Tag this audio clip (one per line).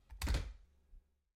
slide
click
stapler
gun
tick
hit
clip
staple
thud
cock